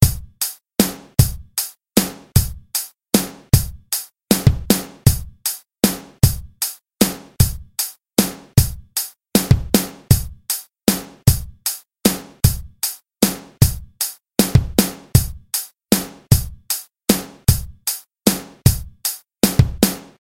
13-8 beat a extended
A drum pattern in 13/8 time. Decided to make an entire pack up.
8, 13, kit, 08, 13-08, pattern, 13-8, drum